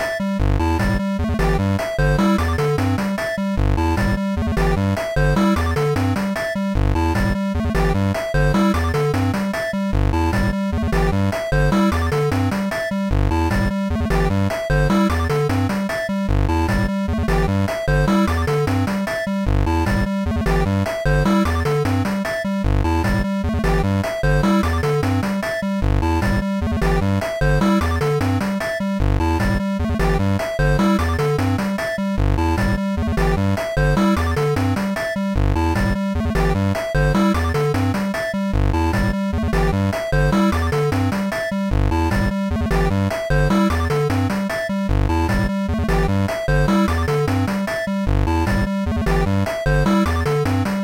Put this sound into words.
8-bit heaven
so i made this pretty cool chiptune in modded beepbox (Windows 93) you can use this if you want to
8-bit, 8bit, arcade, chiptune, game, music, original, retro, video-game, videogame